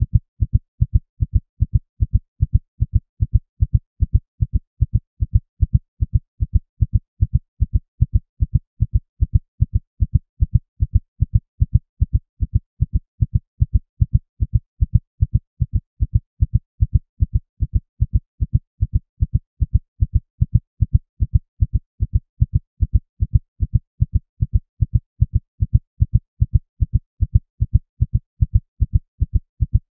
heartbeat-150bpm
A synthesised heartbeat created using MATLAB.
heart, heart-beat, heartbeat